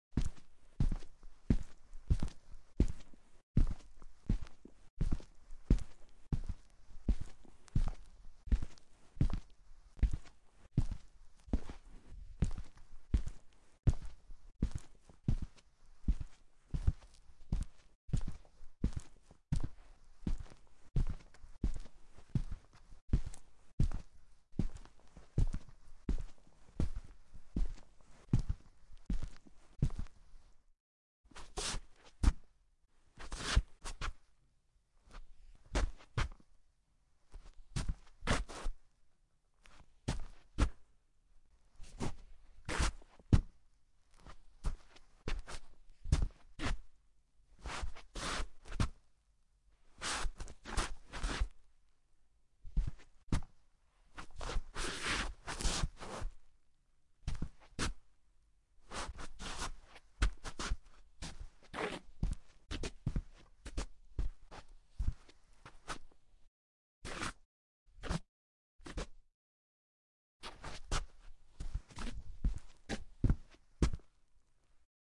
footsteps rug
Slowly walking on a rug (on wooden floor), wearing leather shoes.
EM172 (on shoes)-> Battery Box-> PCM M10.